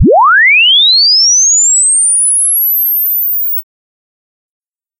0Hz to 22500kHz in 5 seconds
Sine wave sweep from 0 Hertz to 22.5 kilohertz generated in Audacity.